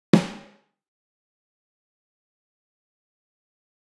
Two acoustic snares layered, added reverb, recorded with SE X1 condenser mic and shure sm58.
Dominic Smith